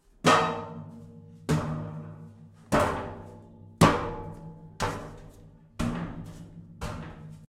subiendo una escalera de metal
bajando escaleras de metal
footsteps, steps, downstairs, staircase, upstairs, run, stairway, stairs, stair